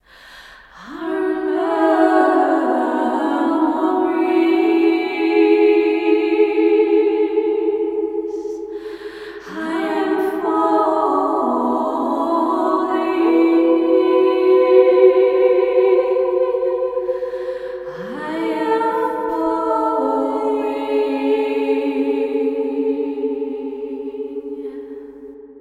A vocal clip (2nd chorus, alternate end) from my song "falling", describing how I view death.
Recorded in Ardour with the UA4FX interface and the the t.bone sct 2000 mic.
Please note: squeaky/screechy sound heard in online stream is not in the downloadable version.